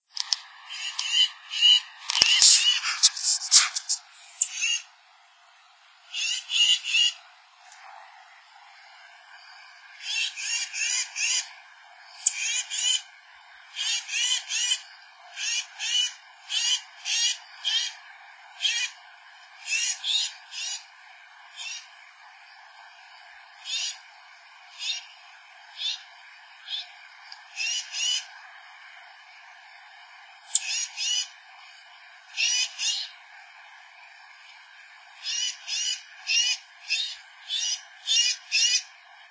unknown bird's song